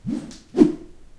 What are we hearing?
I use a bamboo stick to generate some wind *swash* sounds. I used following bamboo stick:
Find more similar sounds in the bamboo stick swosh, whoosh, whosh, swhoosh... sounds pack.
This recording was made with a Zoom H2.